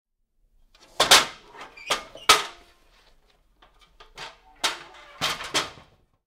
ladder aluminum setup 02
Setting up an aluminium ladder.
hit, clank, clang, ladder, aluminium, metal, metallic, ting, aluminum